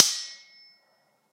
Sword Clash (12)
This sound was recorded with an iPod touch (5th gen)
The sound you hear is actually just a couple of large kitchen spatulas clashing together
ting, ringing, ding, clanging, struck, swords, metallic, ring, iPod, ping, strike, knife, clashing, metal-on-metal, slash, steel, stainless, metal, clash, clank, sword, impact, hit, slashing, clang